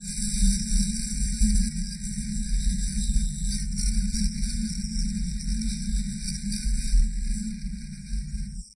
Squeaking Doors Mixture
Six different sounds of squeaking doors played in multitrack looper...
creak, tangle, squeak, door, sonor